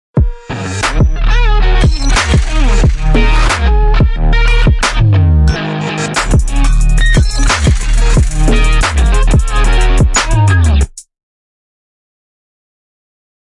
Electric guitar trap
Super weird electric guitar and trap crossover.
beat, trap, loop, weird, slice, guitar